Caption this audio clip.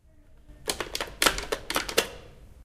This sound is when someone takes soap in the bathroom to clean its hands.
UPFCS12, campus-upf, bathroom